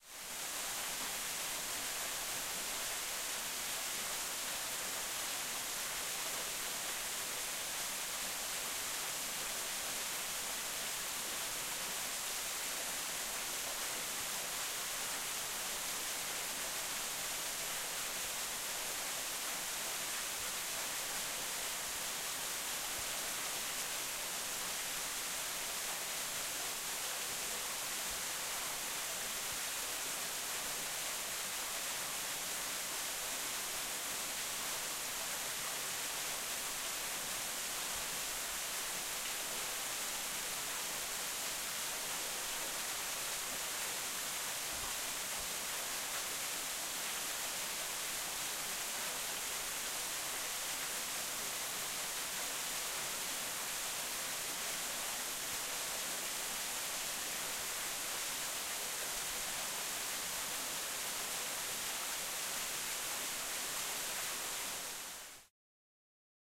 Small Waterfall (more distant approach)
At the National Park of Germany. In some spots there is unfortunately a little bit of recorder movement noise. But there is still a lot of audio that can be used without a problem. Normalized +6db.
waterfall, waterfall-distant-recording